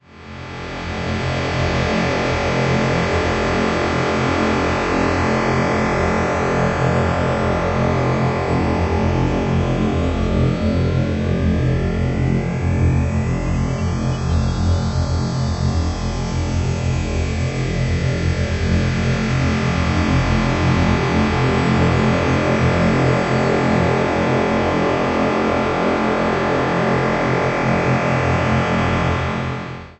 Dark ambient drone created from abstract wallpaper using SonicPhoto Gold.